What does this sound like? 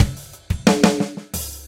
I made these loops about 1yr ago for a project I was working on. I know how difficult it is to find free drum loops in odd time signatures, so I thought I'd share them